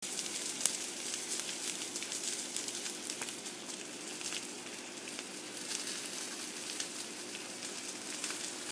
My mom is frying something, and it smells good. Recorded with iPhone 4.
cook, fry, frying, oil, sizzle